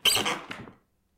Wood Creak Single V10
A single creaking wooden floor step. This is one of multiple similar sounds and one longer recording with 4 creaks in the same sound pack.
bending, creak, walk, squeakey, squeaking, board, wood, creaking, floors